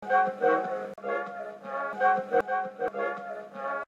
Bluish Danube III 01
Just a snippet of a piece I have created for the latest Disquiet Junto project:
The same wax cylinder recording of the Edison Symphony Orchestra playing "Blue Danube" by Johann Strauss (1902) was again 'abused' to create something new.
I really like this loopable section of my larger piece, so I upload it here also as a loop.
Maybe it will be useful for others and generate even more derived works from that cylinder recording. That would be wonderfull...
If anyone else likes cylinder recordings, please check this resource: